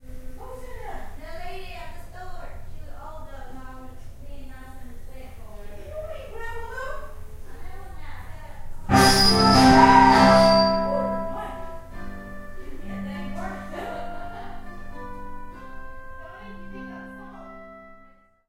Alabama roadtrip. Finally arrived in Alabama. Attempts at setting up a karaoke machine fail for a while. This is the result.
distortion, equipment, loud, Malfunction, microphone, music, scream, speaker, Squeak, squeal